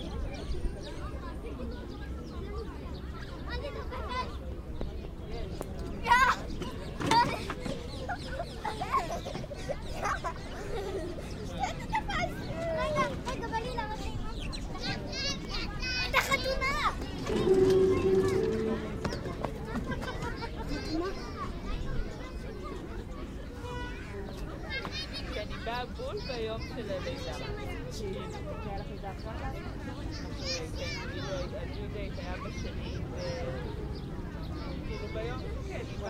city park Tel Aviv
city park with playground in Tel Aviv Israel
PARK, Playground